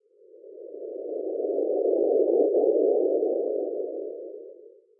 Spectrograph of violin in coagula to create space string section.